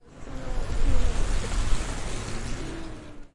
VELODROMO BOGOTA ciclas pasando 5
Proyecto SIAS-UAN, trabajo relacionado a la bicicleta como objeto sonoro en contexto de paisaje. Velódromo de Bogotá. Registros realizados por: Jorge Mario Díaz Matajira y Juan Fernando Parra el 6 de marzo de 2020, con grabadores zoom H6 y micrófonos de condensador
objeto-sonoro, bicycle-sounds, velodromo-bogota, Proyecto-SIAS